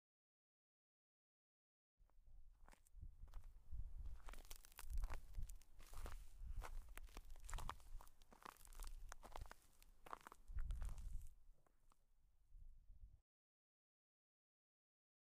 Walk - Ice
Walking on ice